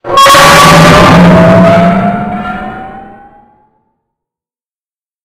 Ascending Jumpscare
Another jumpscare sound effect made in Audacity.